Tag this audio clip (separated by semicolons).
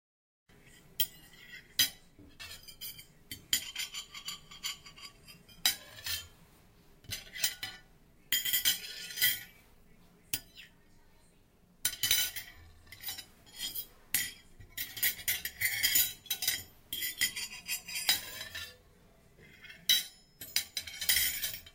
Dish; Crashing